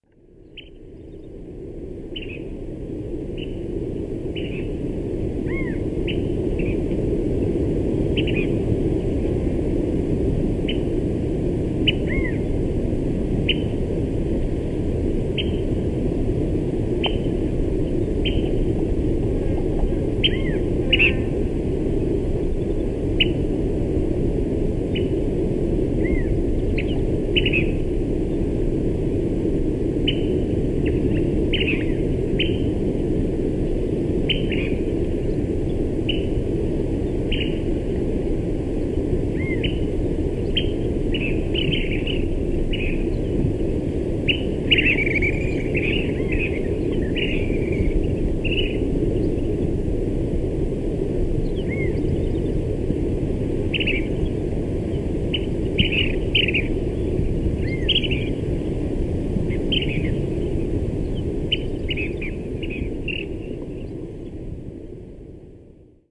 CFv1 track11
baja-california-norte, punta-san-carlos